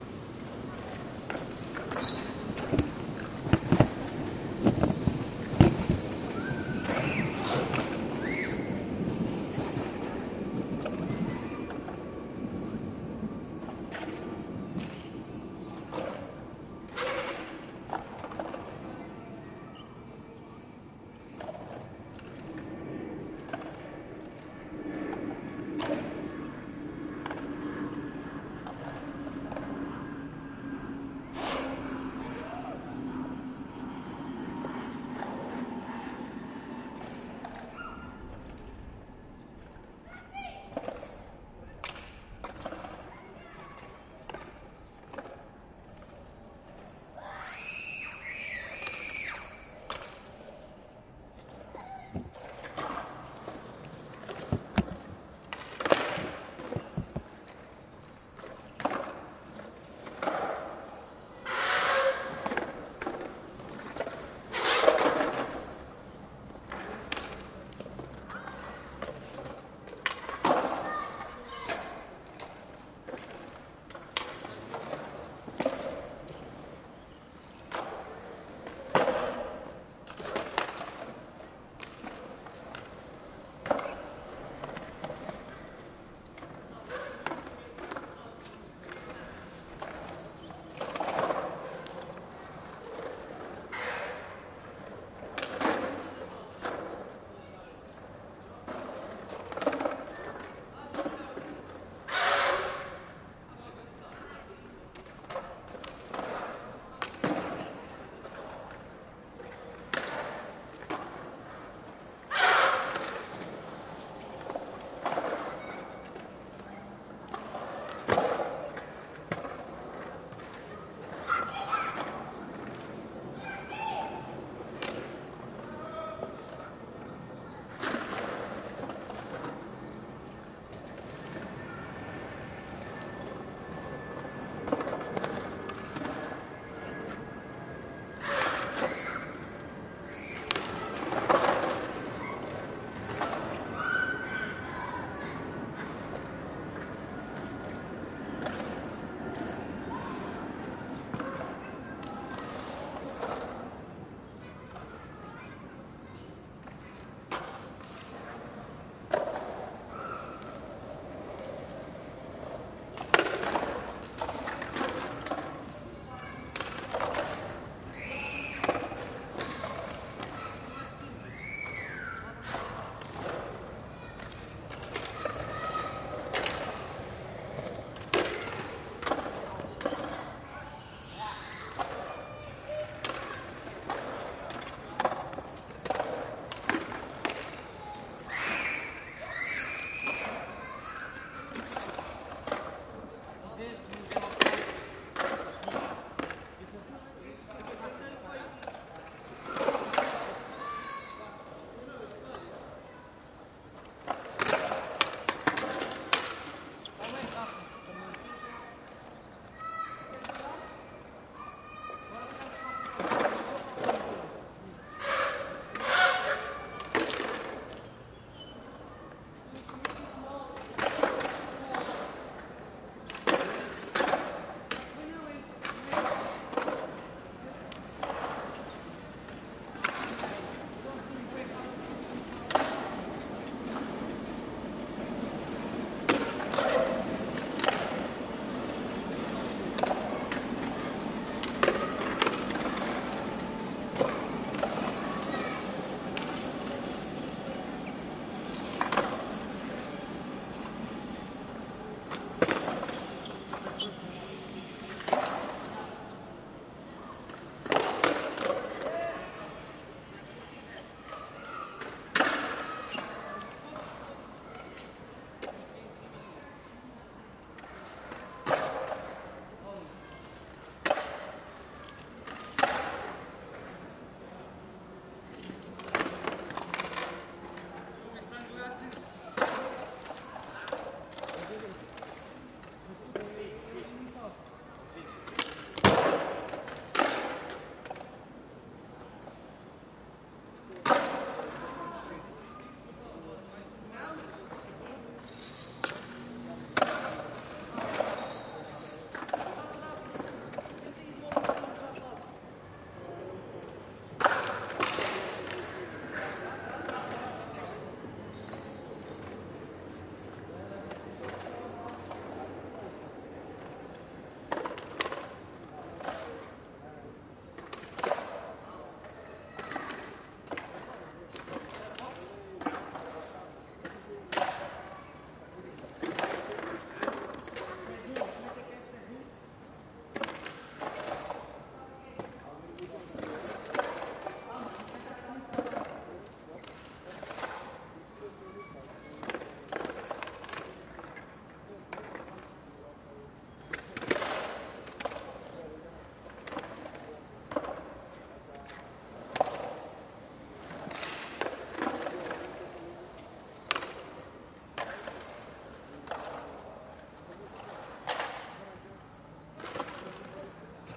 Crowded Skatepark in a sunny day in the afternoon
city, ambience, skatepark, field-recording, ambient